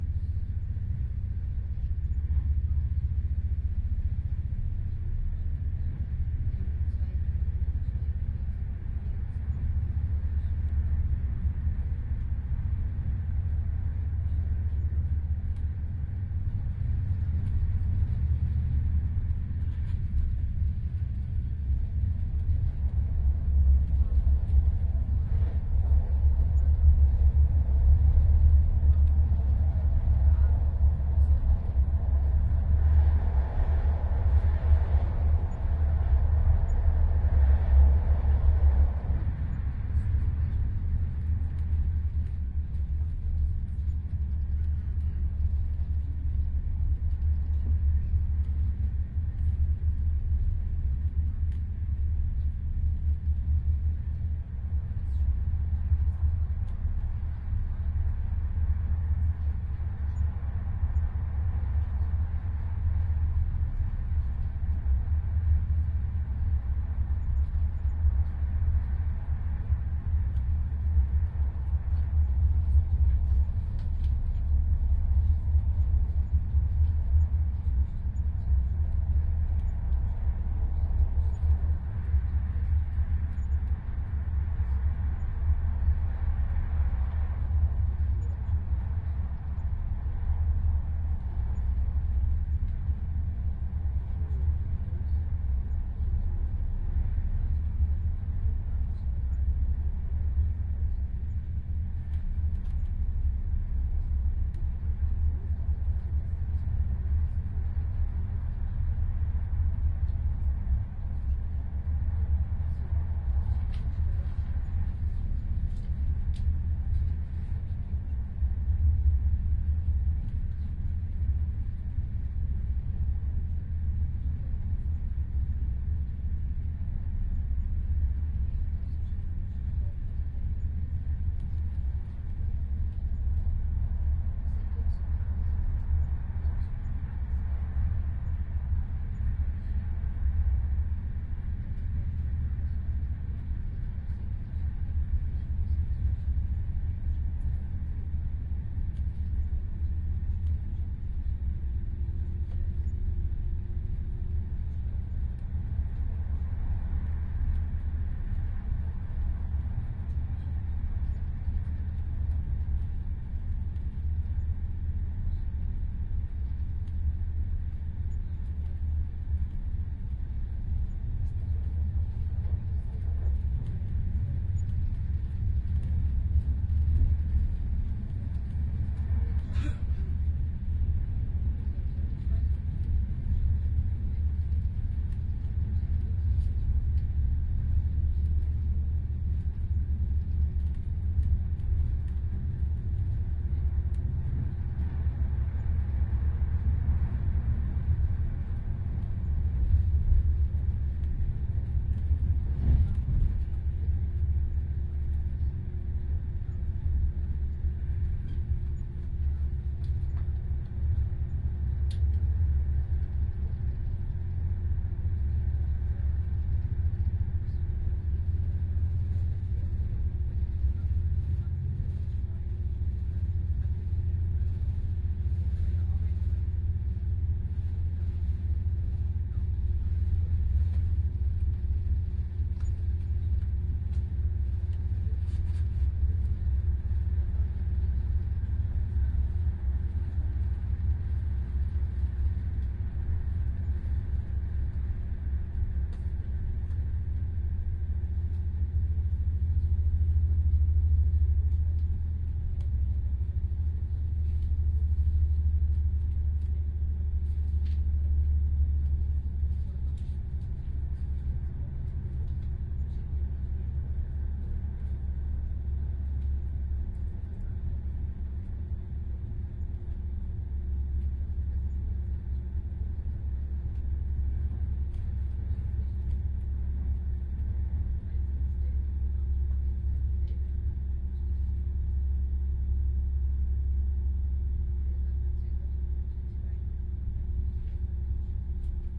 innercity train
On board a moving train in Germany. Recorded with the Soundman OKM microphones into R-09HR recorder.
binaural, field-recording, fieldrecording